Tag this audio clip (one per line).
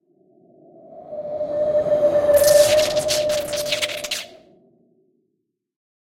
fantasy
mechanism
portal
sci-fi
sparks
transporter